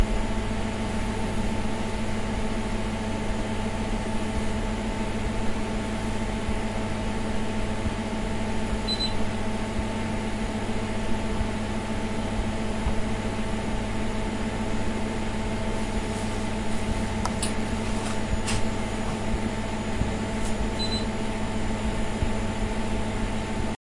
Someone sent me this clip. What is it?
room tone small market
Atmo in small market
Recorded on ZOOM H4N